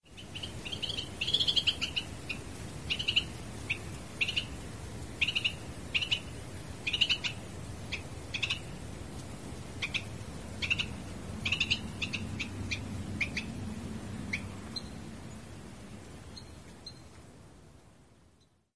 A recording I made literally on my front porch in January as a big mass of cold air was arriving with gusty winds. This Wren was just chattering away.
Made with Zoom H4-N using its internal microphones, with the record volume
set on 80.